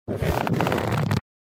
Leather Stress 2 (Short)
Leathery sound made from my keyboard pressing against clothing. Cleaned up audio to remove any unwanted noise. 3 other variations of this sound can be found within the "Leather Stress" pack. Recorded on Sony PCM-A10.
Twisting, Bending, Gloves, Bend, Creek, Couch, Movement, Jacket, Rubbing, Rub, Foley, Creak, Stress, Squeak, Bag, Squeaking, Short, Leather, Creaking, Twist